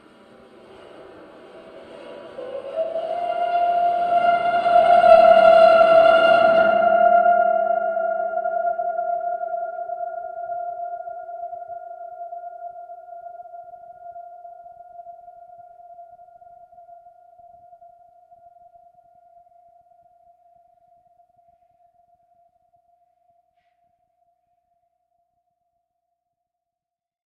CD STAND OF DOOM 075
The CD stand is approximately 5'6" / 167cm tall and made of angled sheet metal with horizontal slots all the way up for holding the discs. As such it has an amazing resonance which we have frequently employed as an impromptu reverb. The source was captured with a contact mic (made from an old Audio Technica wireless headset) through the NPNG preamp and into Pro Tools via Frontier Design Group converters. Final edits were performed in Cool Edit Pro. The objects used included hands, a mobile 'phone vibrating alert, a ping-pong ball, a pocket knife, plastic cups and others. These sounds are psychedelic, bizarre, unearthly tones with a certain dreamlike quality. Are they roaring monsters or an old ship breaking up as it sinks? Industrial impacts or a grand piano in agony? You decide! Maybe use them as the strangest impulse-responses ever.